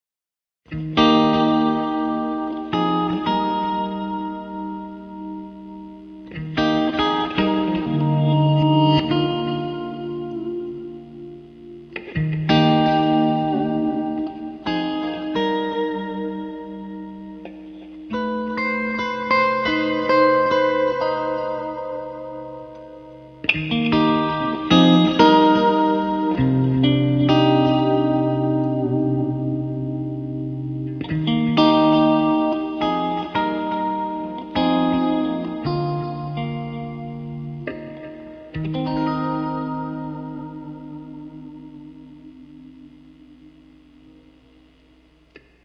chordal meandering 9
chords improvised on guitar